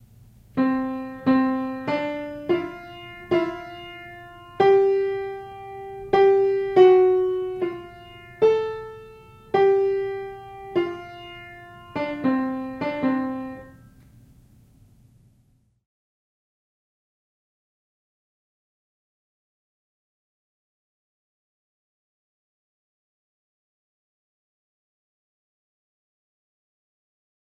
piano played badly
What an early piano student might sound like when playing a new piece of music - Recorded with a Sony ECM-99 stereo microphone to SonyMD (MZ-N707)
piano, student